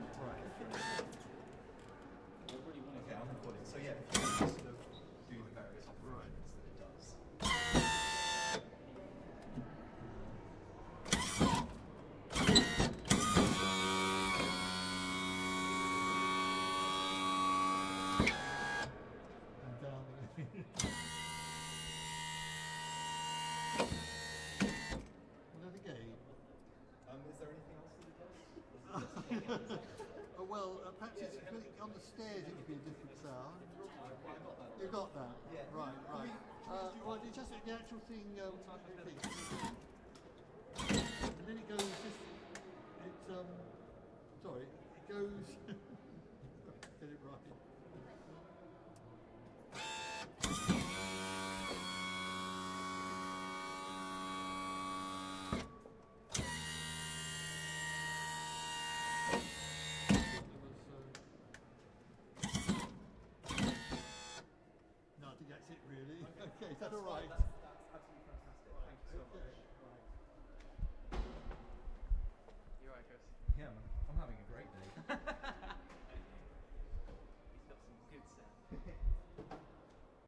Servo noises
Recorded on Marantz PMD661 with Rode NTG-2
While working on a project I saw a man operating a machine with tank-treads designed for lifting wheelchairs up and down stairs. I took him aside and asked if I could record the internal motors and servos working.